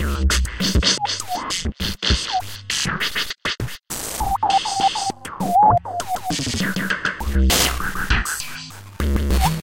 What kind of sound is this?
One in a series of 4-bar 100 BPM glitchy drum loops. Created with some old drum machine sounds and some Audio Damage effects.
digital, 4-bar, 100-bpm, sound-design, glitch, loop, beat, bass, snare, drum